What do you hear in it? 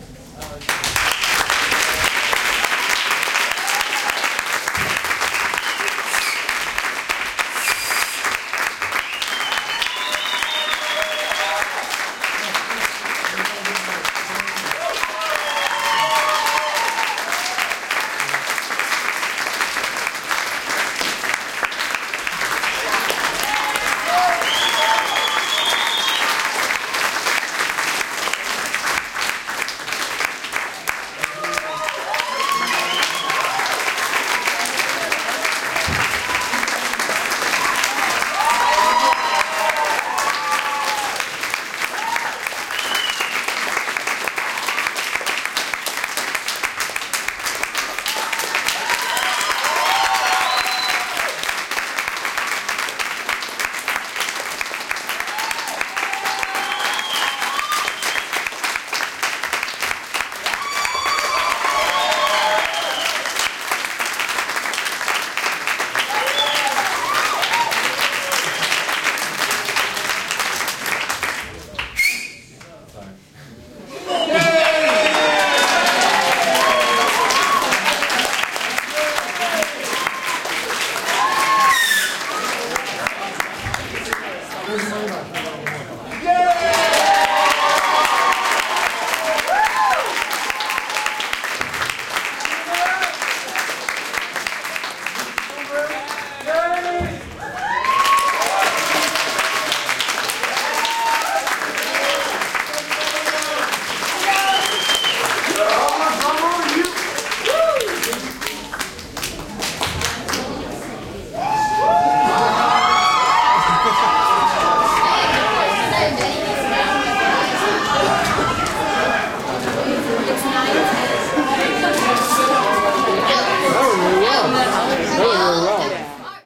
curtain call-16
Applause and cheering of a crowd during a curtain call. The cheering and clapping varies in intensity as different actors come out to take their bows. Some spontaneous cheering at the end, too- the lights went out but the crowd could still see the drummer's glow-in-the-dark "Green Lantern" shirt. Recorded with a Canon GL-2 internal microphone.